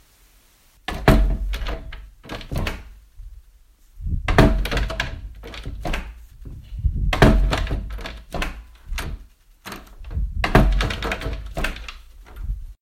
Sound of a door opening and closing several times